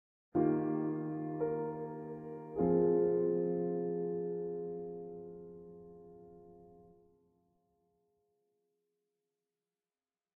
The conclusion of phrase 6 musically repeated.
melancholy, pain, sorrow